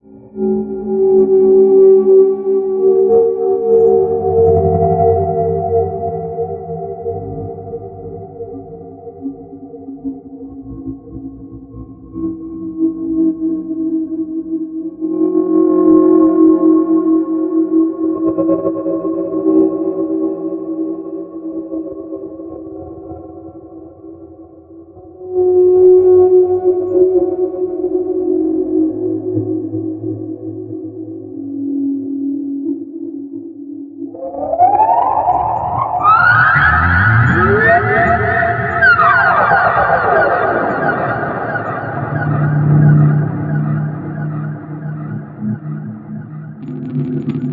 Samurai Jugular - 25
A samurai at your jugular! Weird sound effects I made that you can have, too.
dilation, effect, experimental, high-pitched, sci-fi, sfx, sound, spacey, sweetener, time, trippy